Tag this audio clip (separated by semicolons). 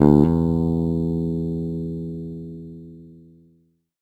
electric; guitar; bass; multisample